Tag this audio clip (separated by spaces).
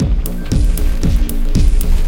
03 116 bpm